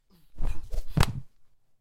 flying kick 2
Layered sound I made of a flying kick, could be sped up for a normal kick.